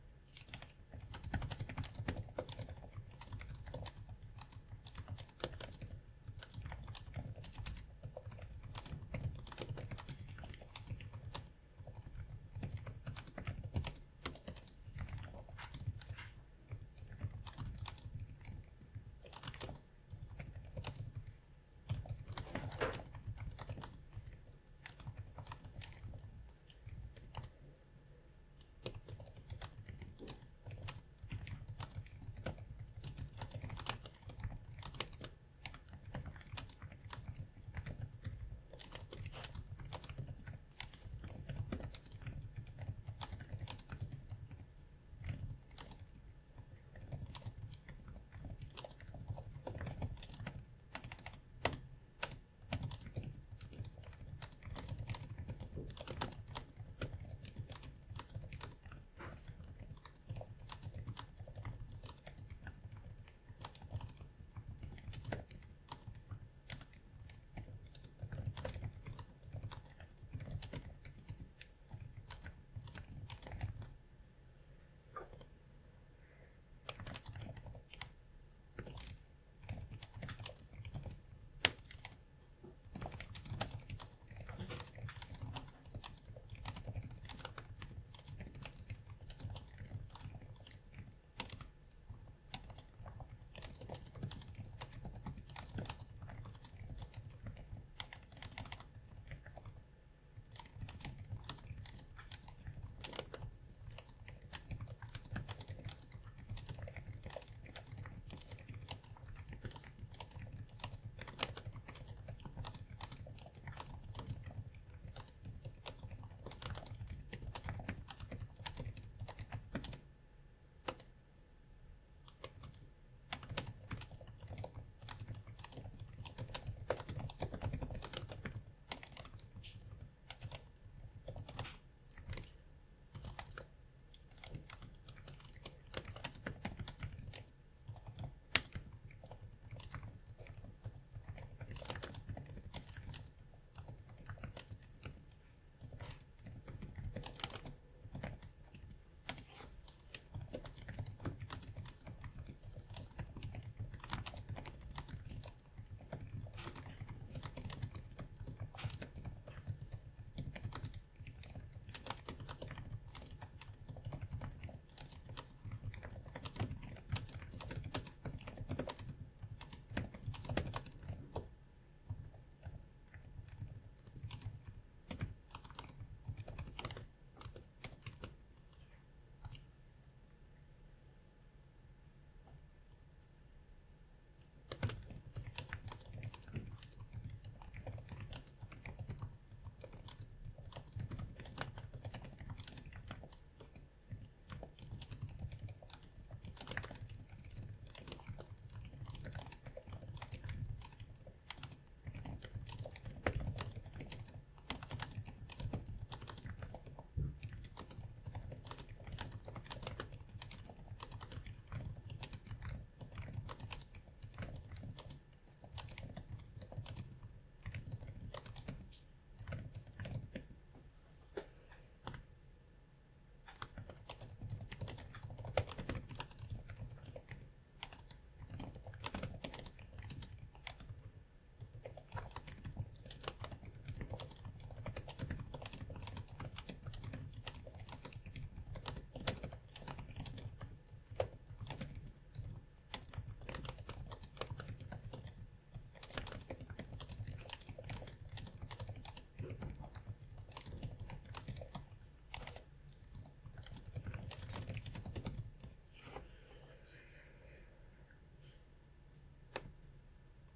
Doing a few typing speed tests on my 5-year-old dell laptop. Average WPM around 70, average accuracy around 96%. Recorded on TC Tonor microphone